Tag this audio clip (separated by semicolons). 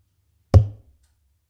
board; dart; hit